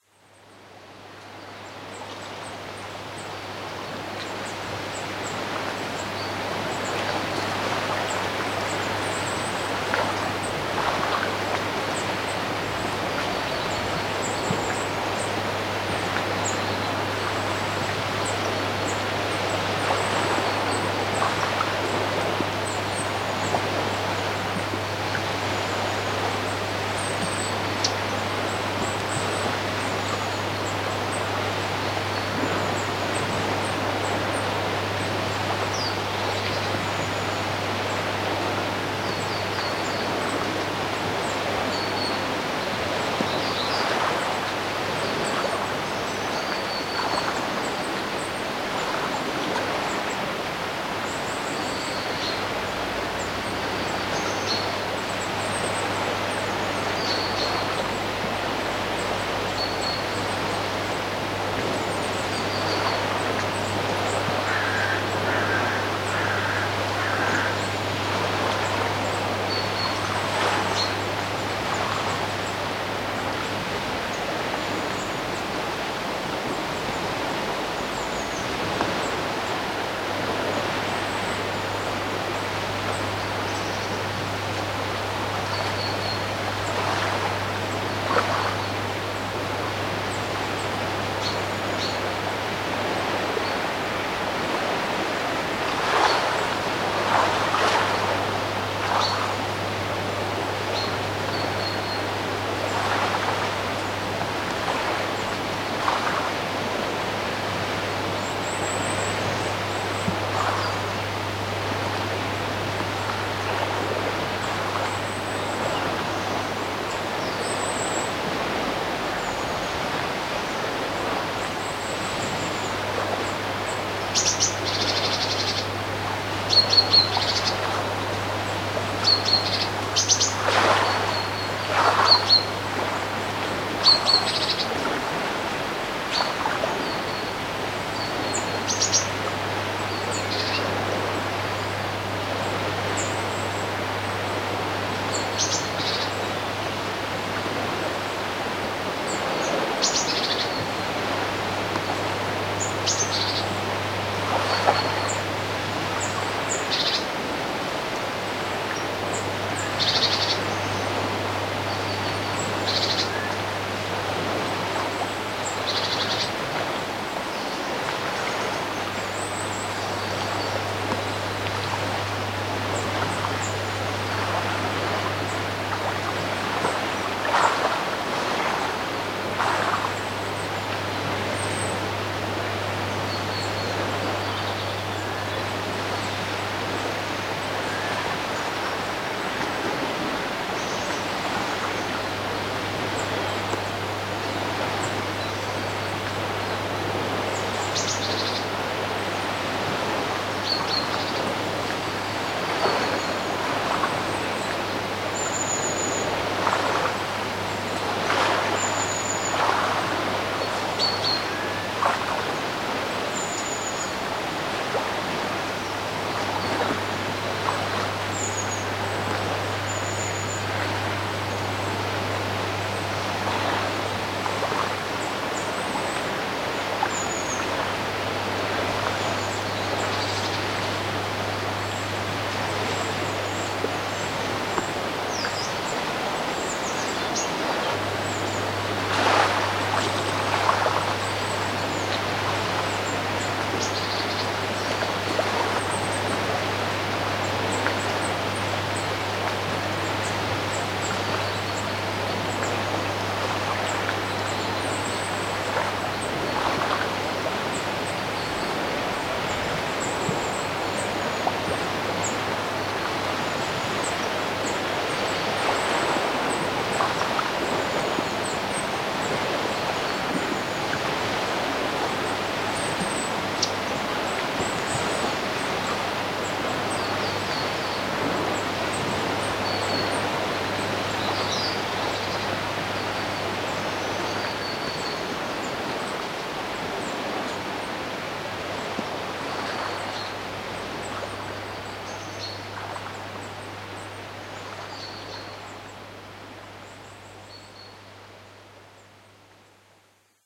10.River-Foyers-Banks
Recording of the river Foyers not far from Loch Ness. You can hear the water splashing on the banks of the river and the waves on the shore of the loch.
birds, river, water, field-recording